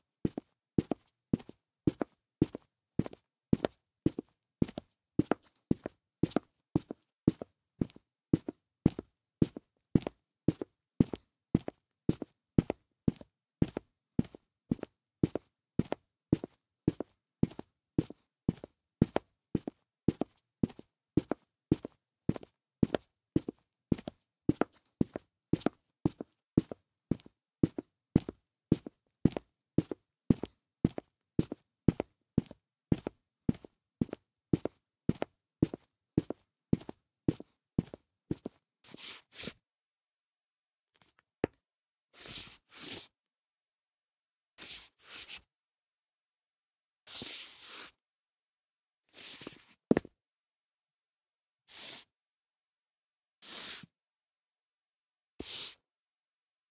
footsteps low shoes
walking on a wooden floor wearing low-shoes (female).
Recording Tools MC-900-> ULN-2-> TC SK48.
feet, floor, Foley, footsteps, hardwood, low-shoe, parquet, shoes, step, steps, surface, walk, walking, wood